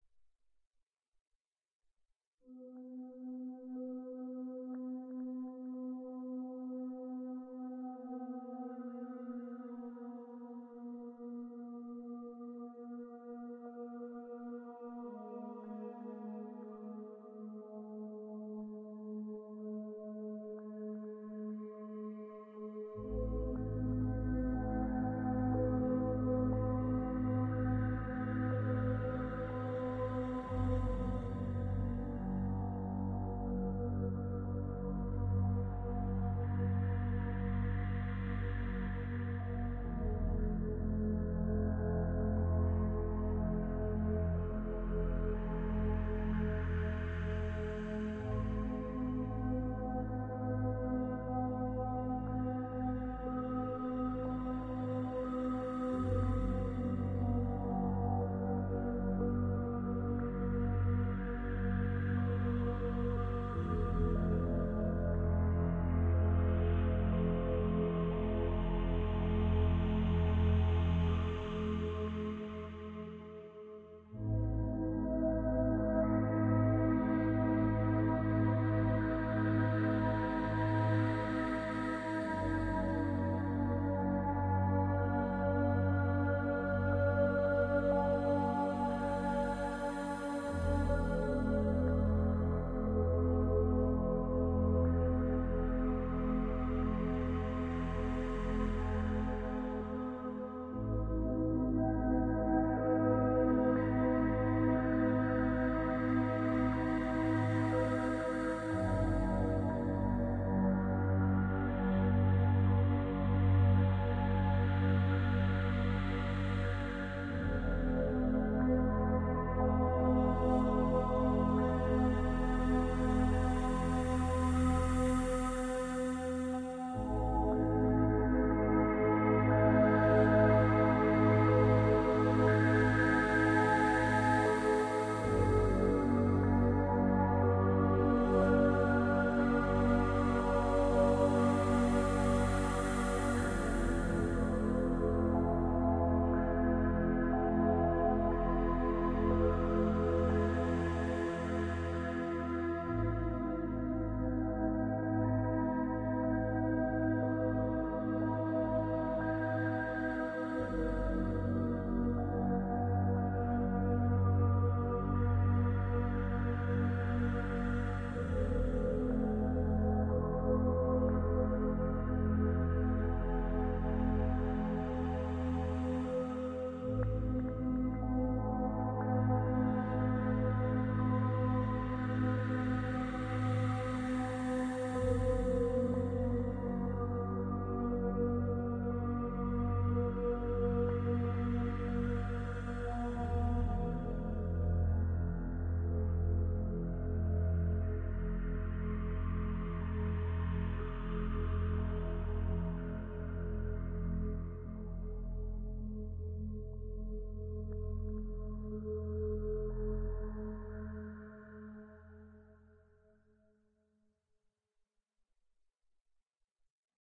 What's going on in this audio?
relaxation music #42
Relaxation Music for multiple purposes created by using a synthesizer and recorded with Magix studio.
relaxing, meditation, relaxation, meditative